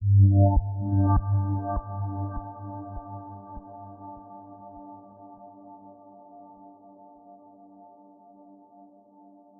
KFA21 100BPM
A collection of pads and atmospheres created with an H4N Zoom Recorder and Ableton Live
ambience, atmospheric, calm, chillout, chillwave, distance, electronica, euphoric, far, melodic, pad, polyphonic, soft, spacey, warm